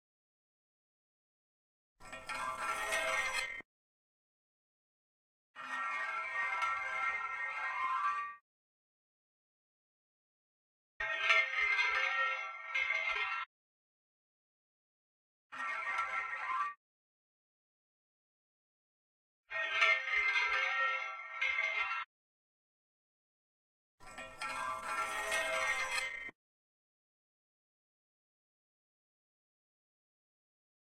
This is the sound of a metal pipe sliding or being dragged across a metal stair railing. There was a noise gate used and also the sound was added an eq to get the sound more clear, I hoped it did. There are some variations like length and speed between the different sounds.